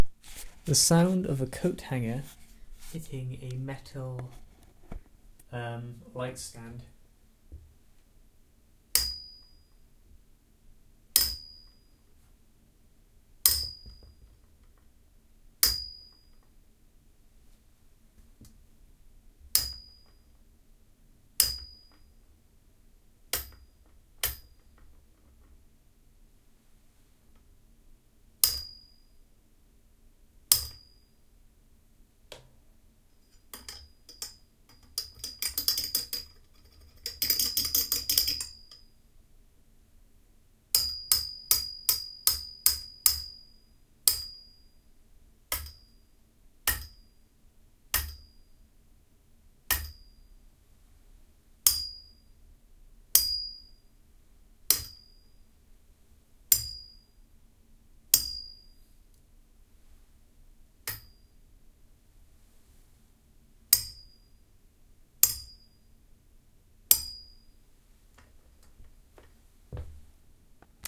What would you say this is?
Coathanger on metal pole1
a narrow metal light stand being struck with a metal coat hanger.
foley, ringing